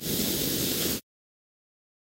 This is a fire trap sound. I used a flamethrower sound that I found on free sound as a basis and then I the cut and trimmed to get the piece of the audio I wanted. I added ReaFir to remove a lot of the static of the sound.
Trap, Game, Fire